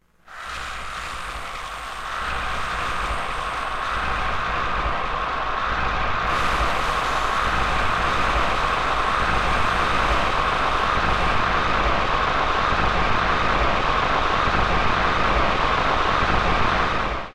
Chasing monster
sounds of something paranormal chasing.